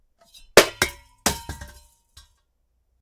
small metal lamp crash (CONDENSER MIC)
Sound of my hand dropping a metal lamp onto a carpeted floor. Recorded with an M149 (more high end).